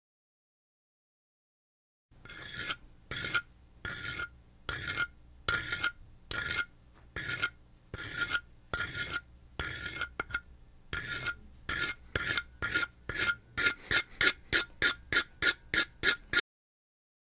Razguñando madera rápido s
La misma madera es razguñada con más velocidad por un tenedor.
accin, cali, diseo, dmi, estudio, interactivos, madera, materiales, medios, rpido, tenedor